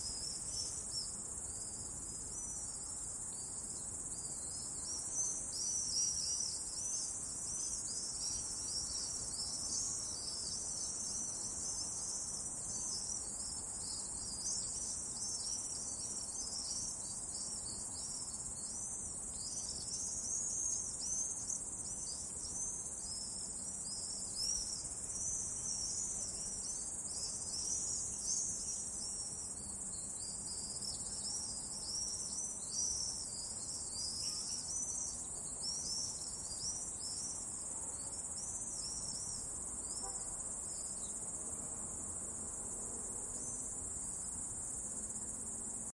Town Swallows Hunting Insects
City swallows hunting insecs for food.
Sample was taken using Rode Stereo VideoMic PRO.
food, insects, swallow, swallows, town